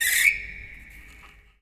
recordings of a grand piano, undergoing abuse with dry ice on the strings
screech, abuse, dry, piano, torture, ice, scratch